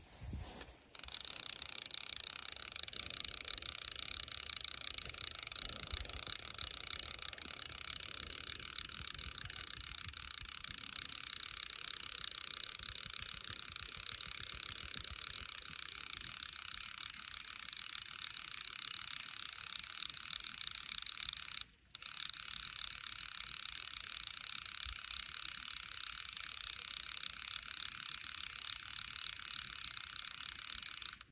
This is the sound of me reeling in a Orvis fly fishing reel